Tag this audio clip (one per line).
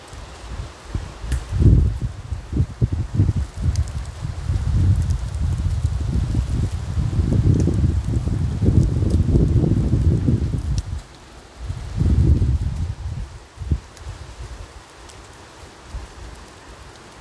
nature
field-recording
forest
wind
soundscape